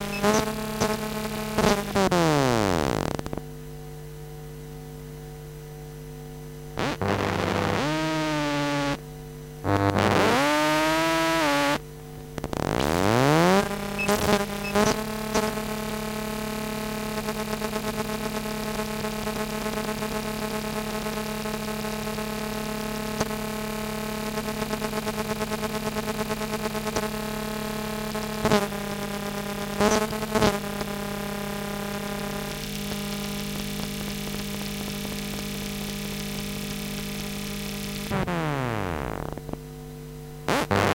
Recordings made with my Zoom H2 and a Maplin Telephone Coil Pick-Up around 2008-2009. Some recorded at home and some at Stansted Airport.
bleep, buzz, coil, electro, field-recording, magnetic, pickup